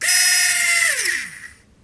Toys-Borken RC Helicopter-13
The sound of a broken toy helicopter trying its best.